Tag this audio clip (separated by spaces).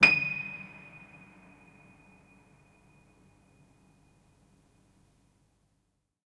string piano sustain detuned